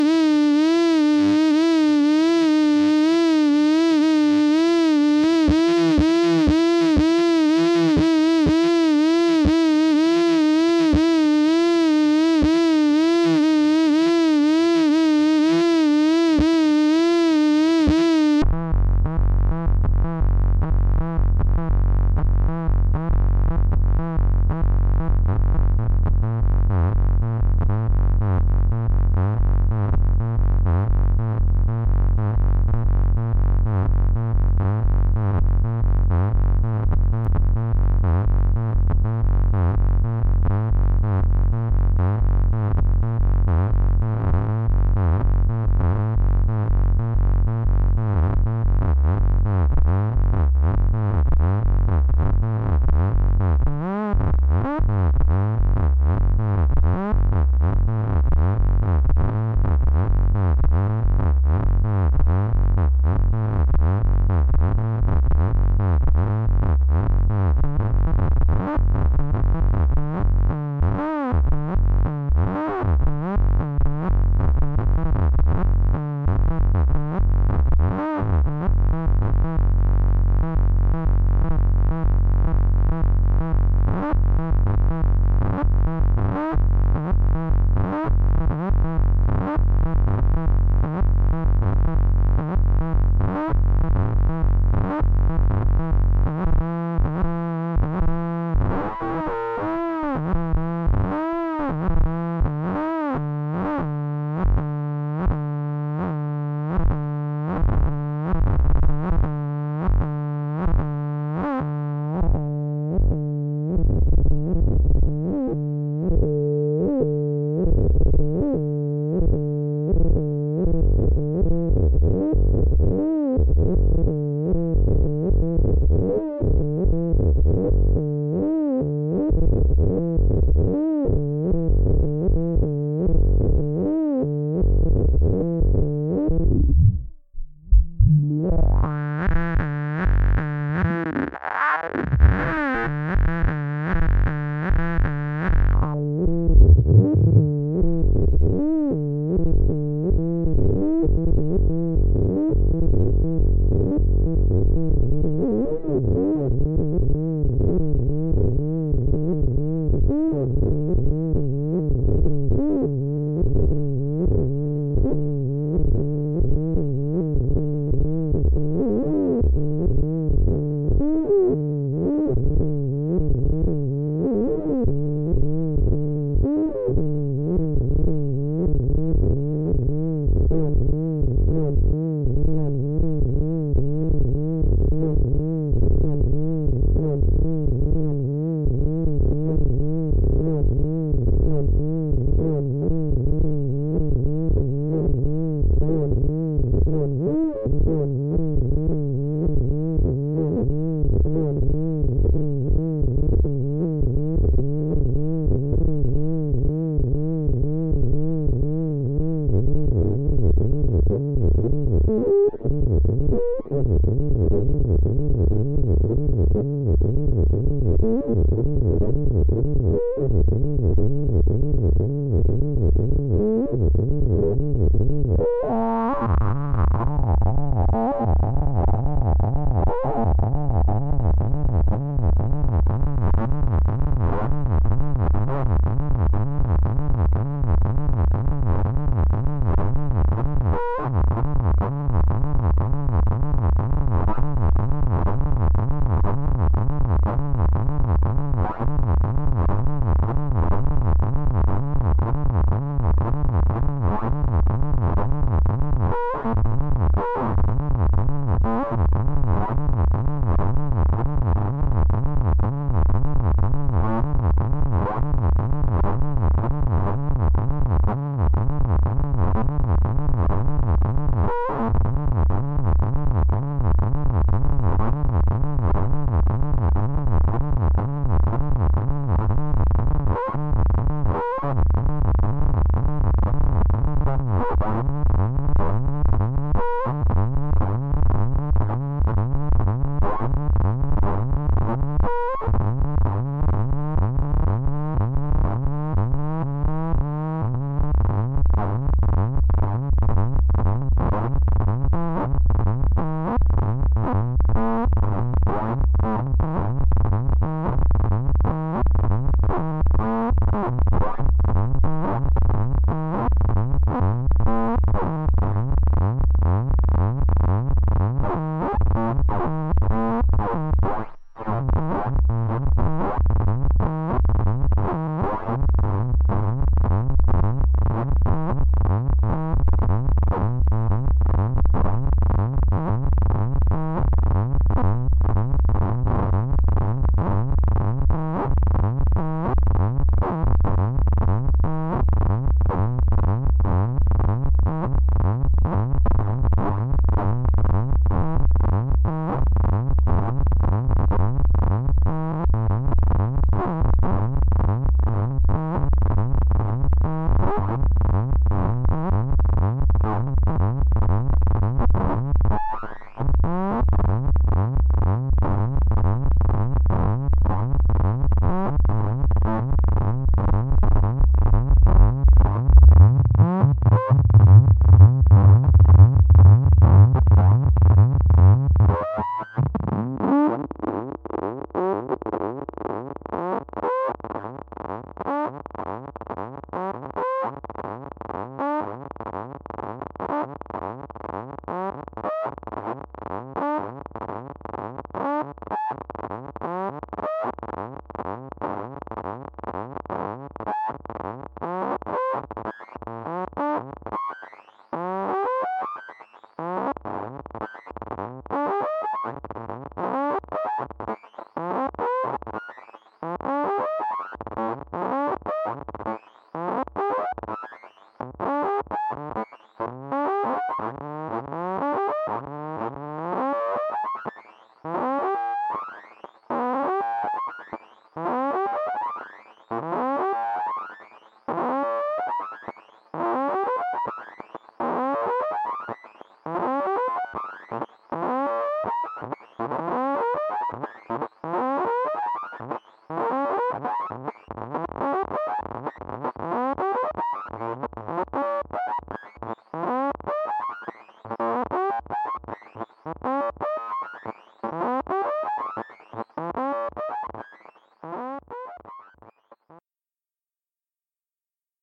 Kamioooka Modular Insanity 1
For those of us without the money and/or the space to have a modular synth, Kamioooka is one of the free VST alternatives.
The modules are fairly simple, the onboard effects are basic. The modules you get are a multimode analogue-style oscillator, ADSR envelope, LFO, multimode filter, Voltage controlled amplifier, sequencer and a utilities module.
Surprisingly, the amount of combinations, internal modulation and madness that can be generated is still insane!
Here is a little modular synth doodling dedicated to my friend gis_sweden.
Only one sine oscillator, feedding back (and syncinc) with itself, along with some filter and LFO modules.